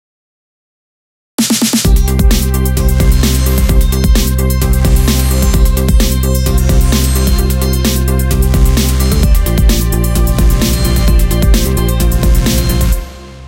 Goal in Space
GOAL!! Well, that just happened. As I got inspired by the video-game "Rocket League" there was one map called "ARCtagon" that had some great jingles when a team scored. From this perspective I tried to create a similar track with some variations, and yes you can still use it for that wonderful Goal-moment. Go ahead and try it :)
Made using FL Studio and various reFX Nexus synths
130-bpm, arctagon, beat, drum, drums, goal, groovy, league, rocket, space, victory